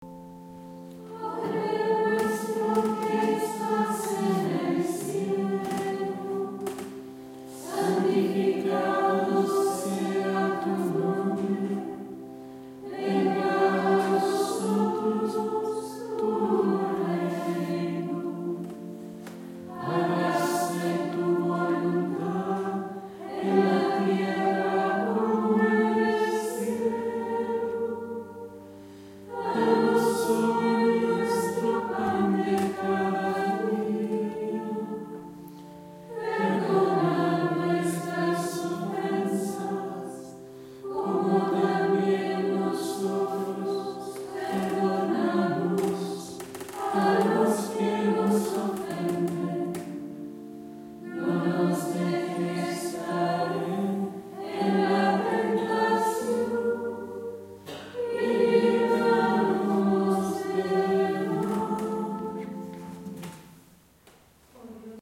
Canto monjas monasterio de Quilvo Chile
canto, Chile, Gregorian, hermanas, monasterio, monjas, quilo, singers